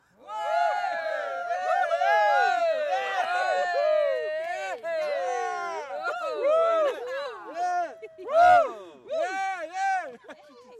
Group of people - Cheering - Outside - 07
A group of people (+/- 7 persons) cheering - Exterior recording - Mono.
cheering, people, Group